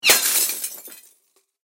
Game bullet for game, rpg gamea sound.
bullet, game, shooting, sound, sounds